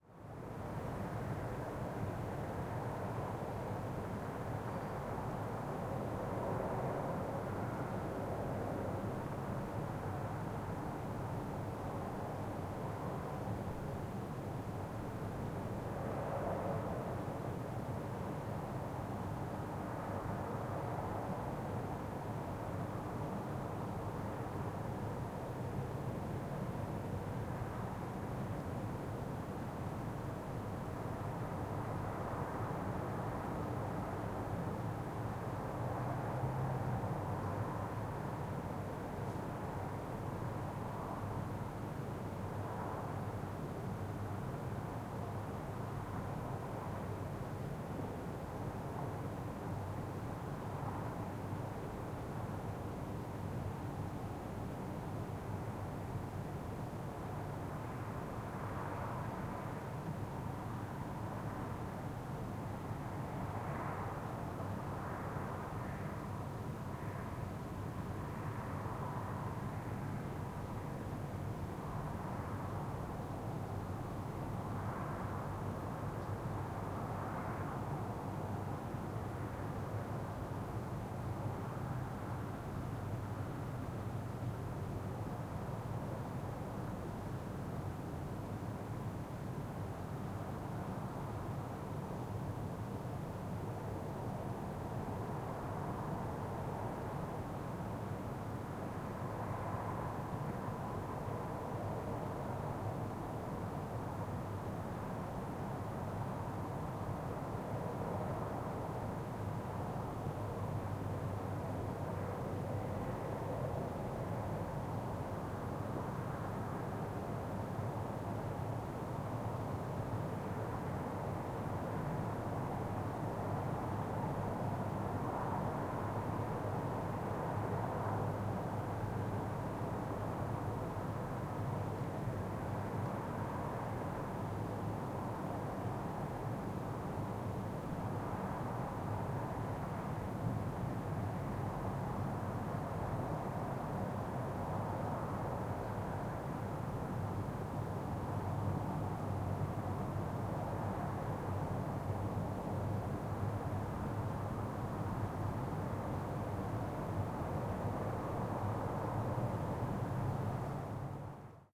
City skyline night wind - - Stereo Out
Field recording of city skyline at night.